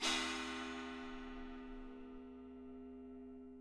China cymbal scraped.